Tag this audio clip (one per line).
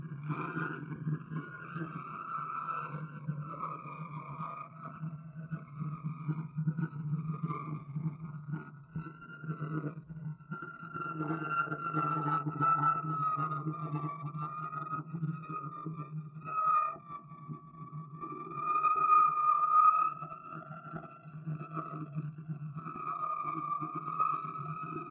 sci-fi
sound-effect
generative
electronic
alien
experimental
processed